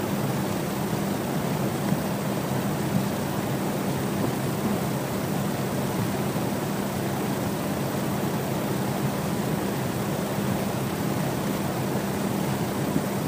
Vent Noise 01
The sound of an electric vent whirring for a few seconds.
industrial, noise, vent